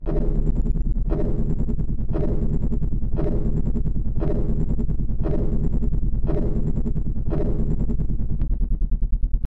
old navy glory
old, glory, navy